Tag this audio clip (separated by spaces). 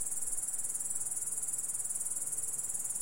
background-sound Grasshopper Nature nature-ambience nature-sound naturesound naturesounds